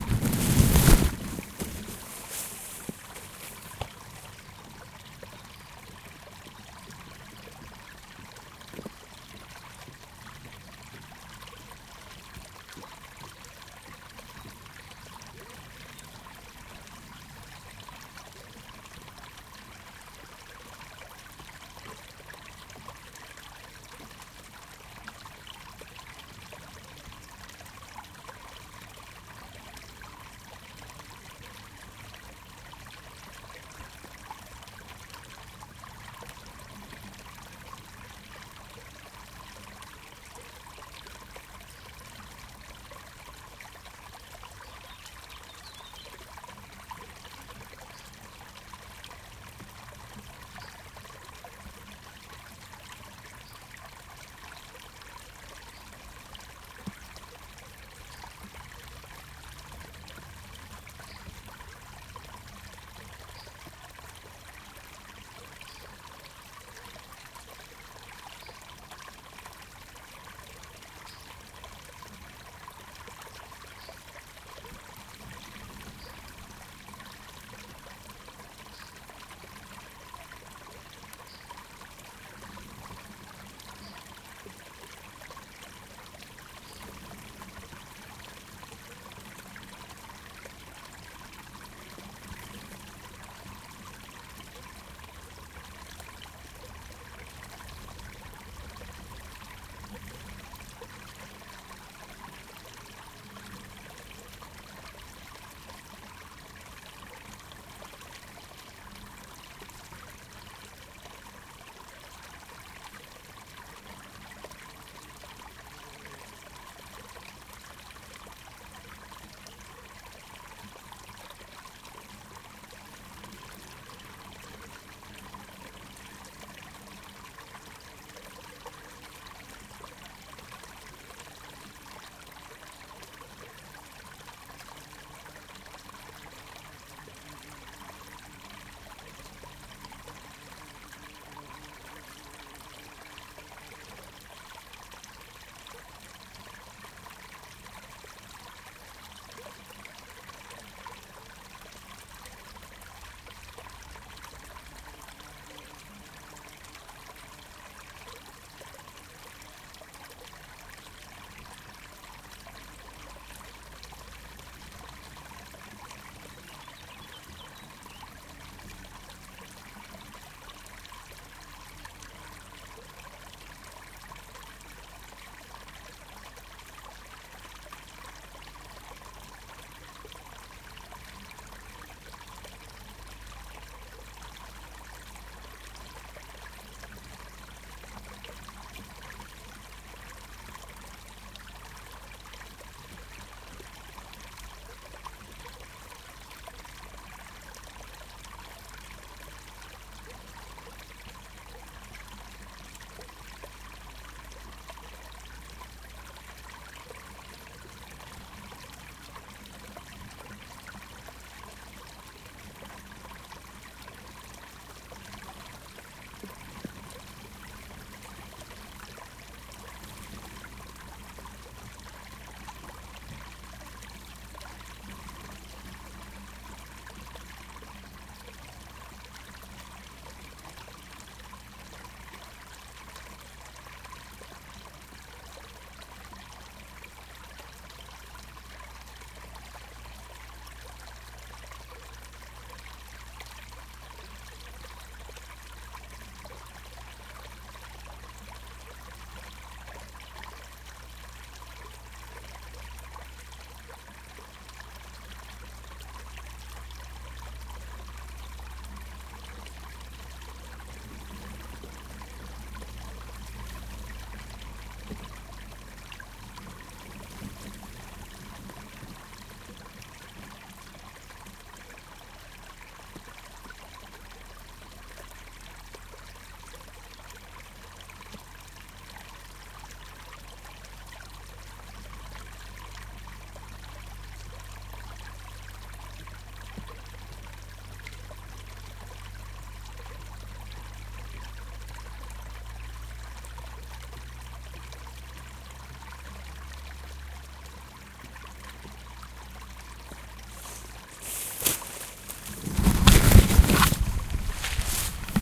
small spring stream in the woods - rear
small spring stream in the woods
ambience, field-recording, forest, nature, small, spring, stream, water, woods